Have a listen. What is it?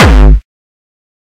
Distorted kick created with F.L. Studio. Blood Overdrive, Parametric EQ, Stereo enhancer, and EQUO effects were used.
bass, beat, distorted, distortion, drum, drumloop, hard, hardcore, kick, kickdrum, melody, progression, synth, techno, trance